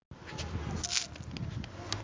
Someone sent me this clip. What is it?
Eating Ice cream cone